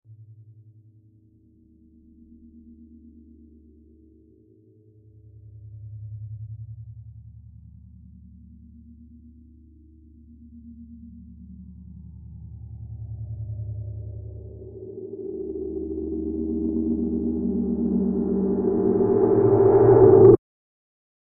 21 sec woosh
FX, Sci-Fo, Swoosh, whip, whoosh